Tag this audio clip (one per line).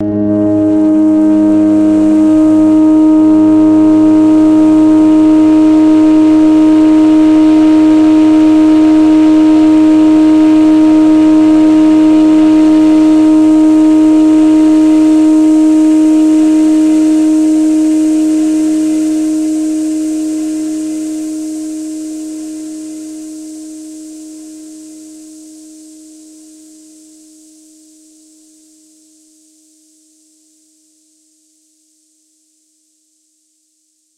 ambient
granular
multisample
pad
rain
strings
synth
tremolo